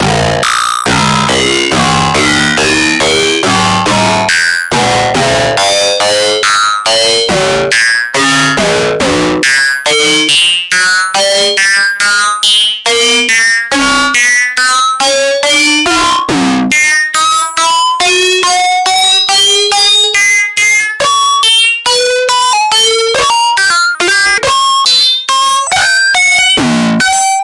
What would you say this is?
Kick 193 - 255. These 64 kicks are created with the help of the granulizer in FL Studio, automation of several parameters and randomized filter cutoff. The result was processed with the FL Blood distortion. Note that these kicks only comes from ONE sample. The automation does the rest. I uploaded them in bundles to minimize the stress for me to write down a good description.
bassdrum, crazy, distortion, filter, hardcore, hardstyle, mad, raw, resonance, timestretch